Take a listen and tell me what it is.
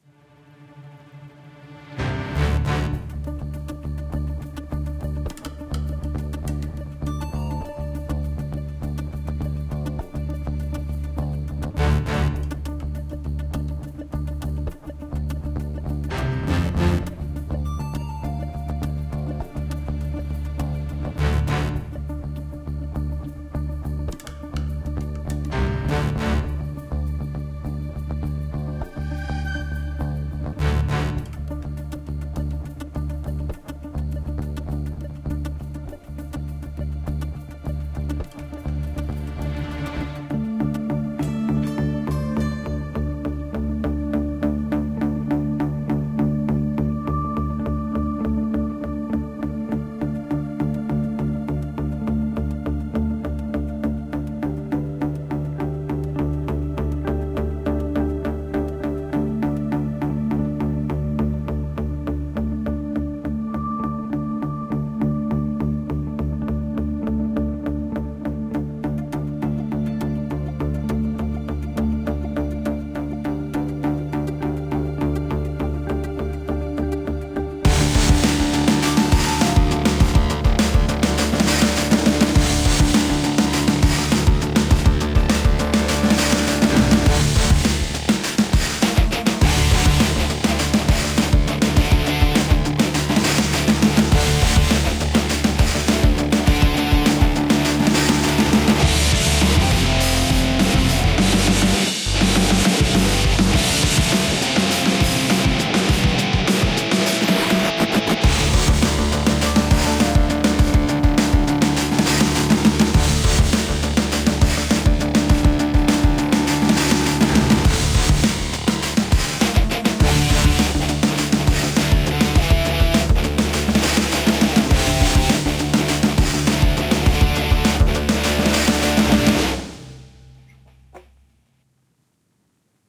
Chiptune Heist Music
Music for a game jam game. First part is stealthy and the second one for being chased
8bit, chip-tune, drums, guitar, heist, intense, lofi, music, stealth, video-game